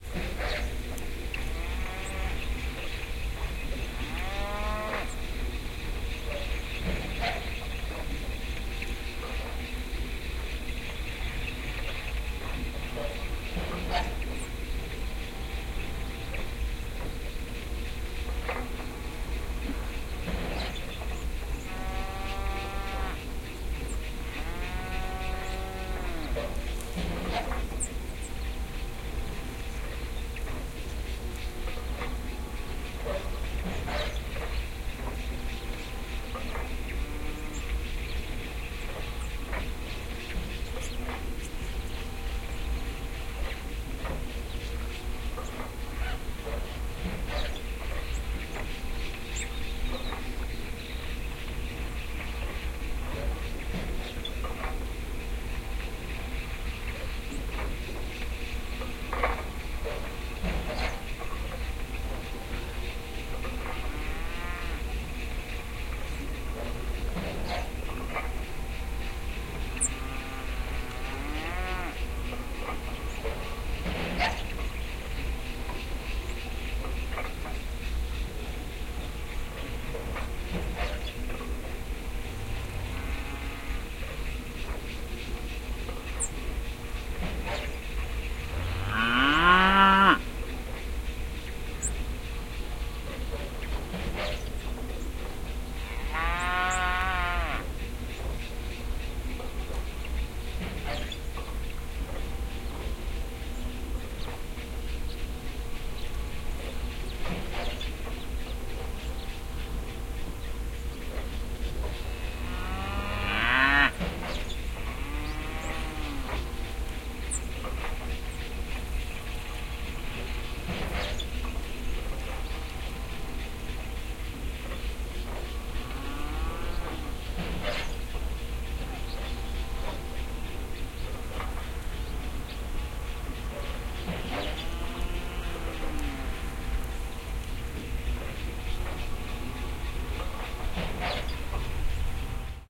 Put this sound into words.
Ambi - Oil welding in field cows birds - binaural stereo recording DPA4060 NAGRA SD - 2012 01 19 California N-E of Bakersfield
4060, California, DPA, NAGRA, Oil, SD, ambi, ambiance, binaural, birds, cows, field, stereo, welding